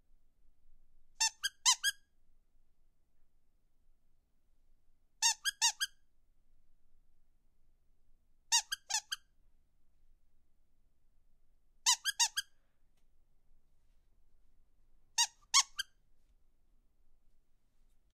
Dog Squeaky Toy - 2 Squeaks
A dog squeaky toy double squeaked.